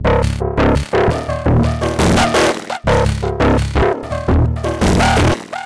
A choppy, dark bass line, with lots of weird filter sequence and noises.